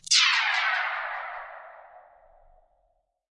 magicShield down
Magic spell being powered down or deactivated.
Dubbed and edited by me.
debuff, effect, magic, protection, shield, spell